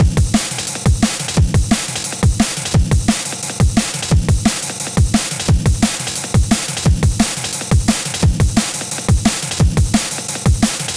Spyre Noisy Break
bass, beats, drum, dnb, jungle, noisy, break, spyre